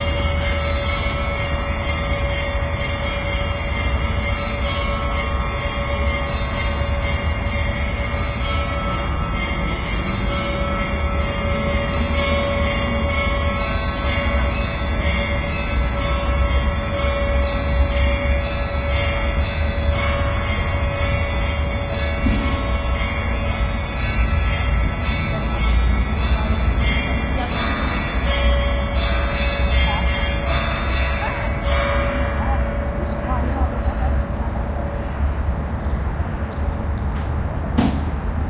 church bells with traffic close
Church bells with traffic in Moscow. Close distance.
Recorded with pair of Naiant XX and Tascam DR-100 MKII in pseudo-binaural array
bells, moscow, traffic, church, russia